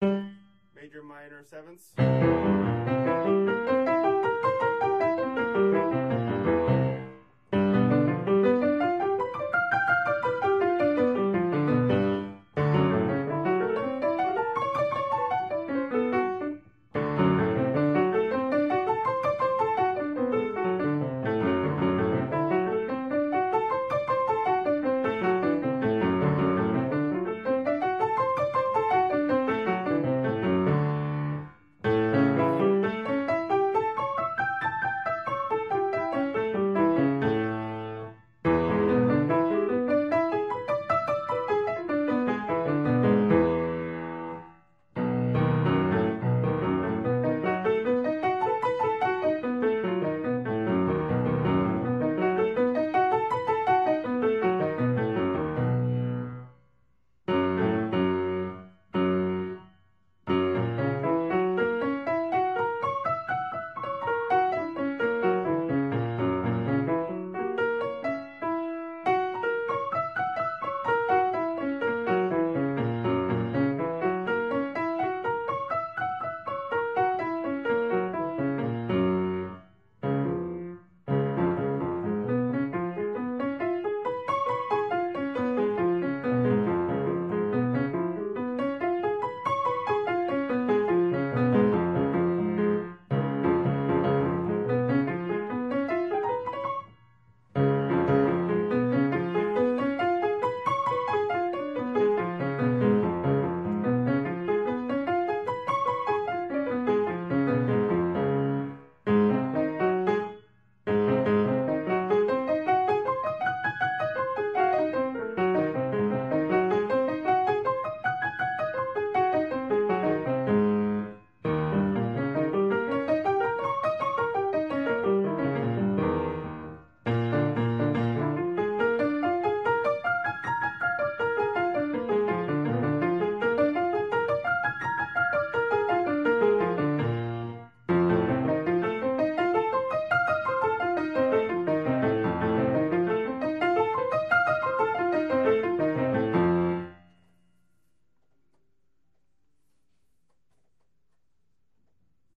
Practice Files from one day of Piano Practice (140502)